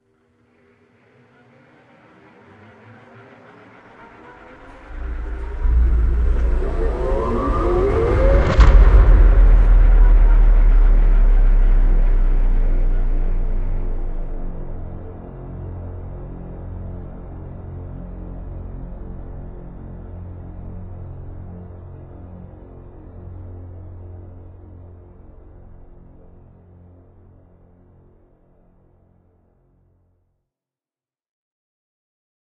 Wrap it up (Full)
Single hit cinematic with break climax and build